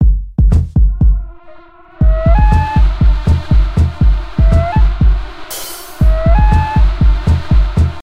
HeadUp 120bpm
Industrial rhythm made in FL Studio using drums, flute and noise, at 120 BPM.